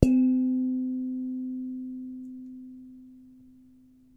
wok lid 01
Hitting a large metal wok lid with the rubber end of a spatula.Recorded with Zoom H4 on-board mics.